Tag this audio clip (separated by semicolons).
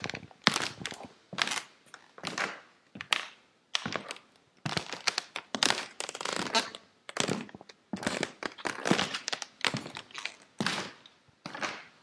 old; walk; wood; floor; walking